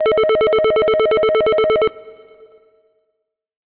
Synthesised modulated telephone ring (with effects tail)
This sound was created using Sylenth1 and imitates a telephone ring ideal for foley sounds or as a background sound in a dance track.
sound, FX, ring, telephone-ring, sound-effect, telephone, effect